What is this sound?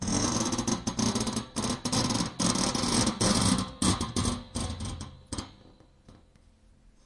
Metal Spring
Dragging a screewdriver on a metalspring, mounted on a tablelamp. Recorde with ZOOM H1.